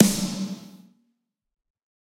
Snare Of God Wet 033
drum, drumset, kit, pack, realistic, set, snare